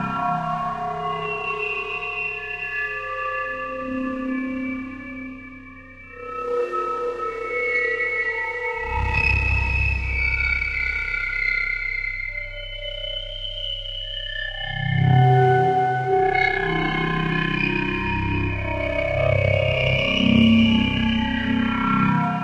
S L 2 Energy Intense 01
Sound of some sort of magical energy being charged.
This is a stereo seamless loop.
Effect, Energy, Magic